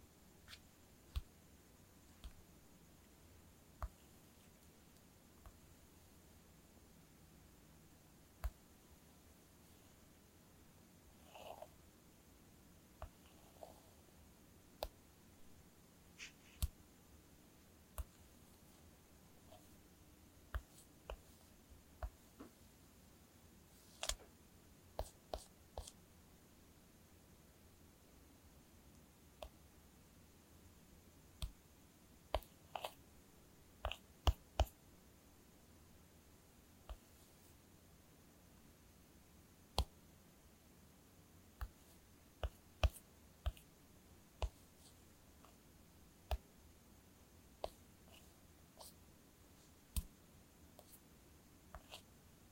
Scroll and Tap Foley - Touch Screen Phone or Tablet
Thumb swiping, touching, tapping on an iPhone screen mic'd in VERY close perspective. No sound or haptics from the phone-- this is just the sound of my thumb moving against the glass. Noise filter applied.
Click, iPad, iPhone, Mobile, Phone, Scroll, Tablet, Tap, Touch